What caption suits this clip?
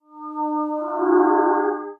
msft vs goog v4
Sonified stock prices of Microsoft competing with Google. Algorithmic composition / sound design sketch. Ominous. Microsoft is the low frequency and Google the higher.
csound, moan, ominous, sonification, spectral